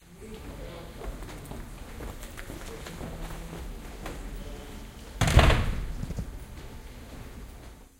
Someone walking towards a door, and shutting it.